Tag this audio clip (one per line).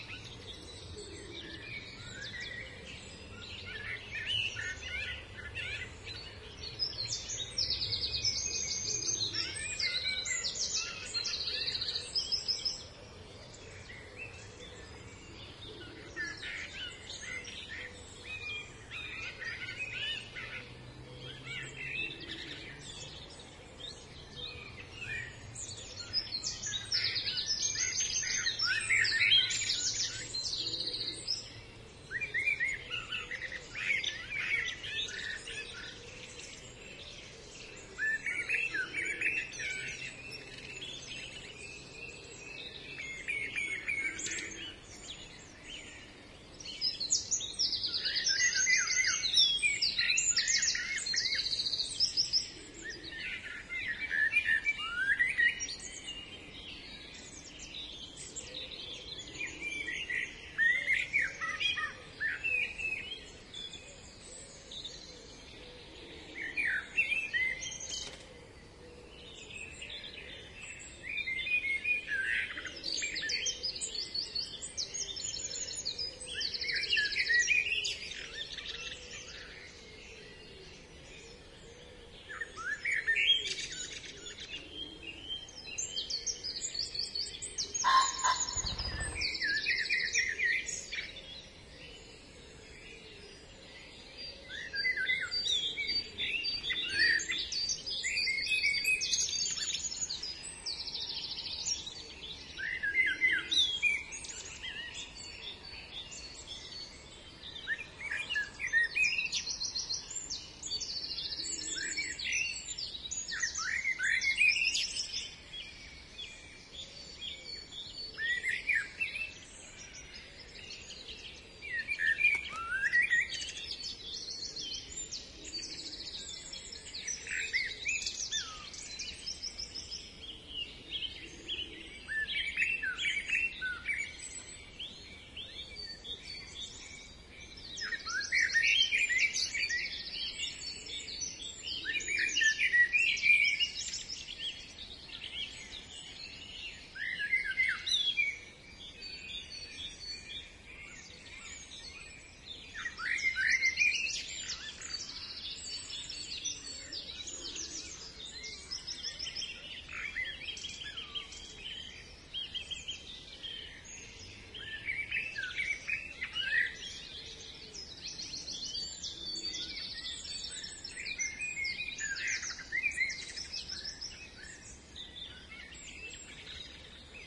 summer
morning
park
spring
birdsong
environmental-sounds-research
bird
forest
ambience
song
busy
trees
energy
dawn